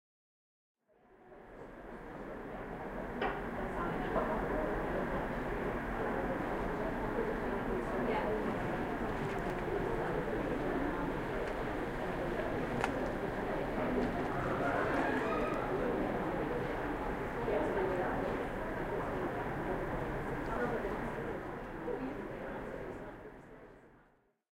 2 08 platform ambience
General ambience of Doncaster railway station platform.
field-recording, railway, atmosphere, speech, station-platform, ambience, voice, train